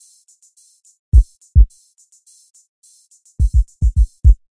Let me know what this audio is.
On Rd Bruce Drums 1
106Bpm, 8 bar loop we created for our On Road Bruce project.